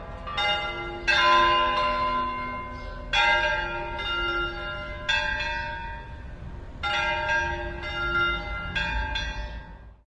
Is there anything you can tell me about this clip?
bells.slowing church close
church bells, close, slowing down / campanas de iglesia, cercanas, muriendo
bells, city, field-recording